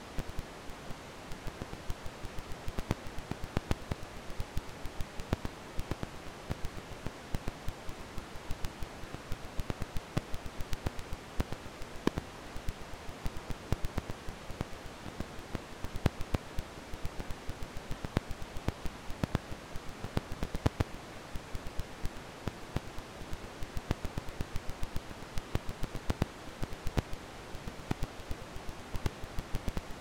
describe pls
A record crackle I built in Audacity. The year and rpm are in the file name.